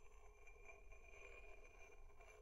fregament metall pla
Scratch between a block of metal and a table of metal. Studio Recording.